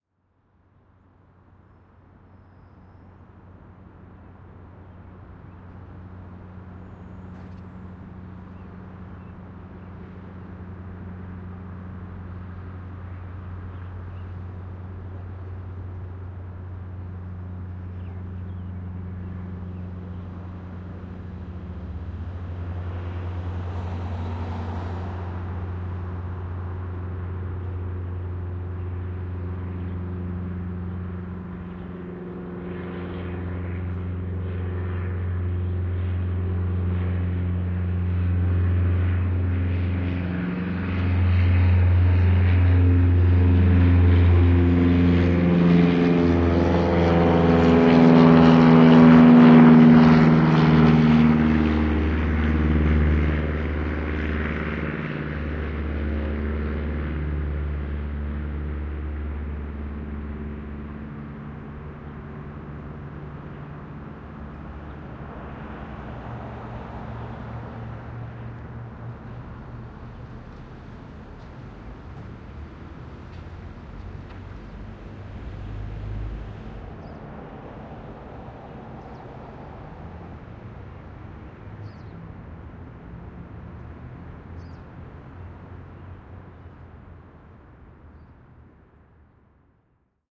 AEROProp low flying airplane 02 tk SASSMKH8020
A small aircraft flies over my apartment in the night.
Microphones: Sennheiser MKH 8020 in SASS
Recorder: Zaxcom Maaxx
airplane, fly-by, urban